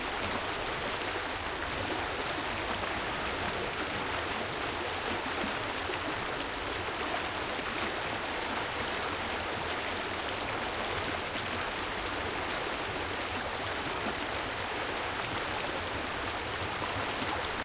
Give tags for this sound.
bubbling
flowing
running
water

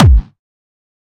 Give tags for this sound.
hardstyle
hard-trance

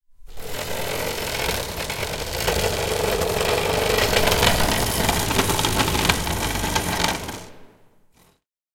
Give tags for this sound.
scrape
grind
file
plank
wooden
scraping
squeaky
squeaking
grinding
filing
scratch
block
wood
scratching

